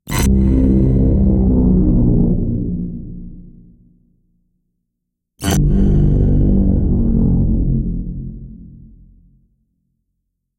Scary Bass C-3, G-2
Made with Sytrus in Fl-Studio, with addition of pitch bend, compression and EQ.
Bass, Horror, Pitch-shift, Portamento, Scary, Sound-Design, Strings, Suspense, Synth, Thriller